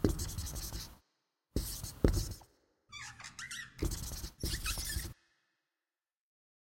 Writing on a whiteboard. Created by combining these sounds;
Cut up, EQ'd and compressed just for you!